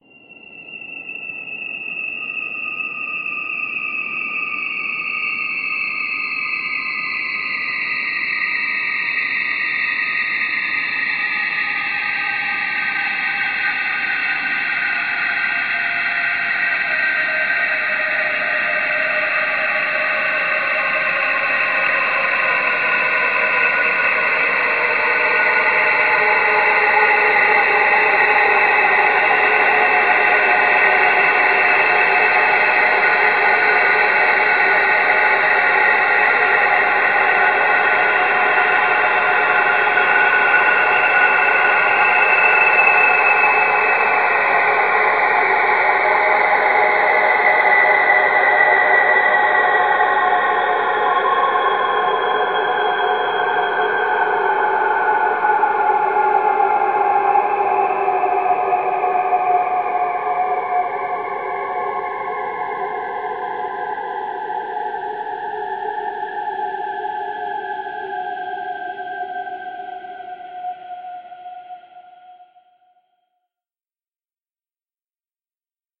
Space Sweep 05
This sample is part of the “Space Sweeps” sample pack. It is a 1:16 minutes long space sweeping sound with frequency going from high till lower. Starts quite whistling and evolves from there to more layered. Created with the Windchimes Reaktor ensemble from the user library on the Native Instruments website. Afterwards pitch transposition & bending were applied, as well as convolution with airport sounds.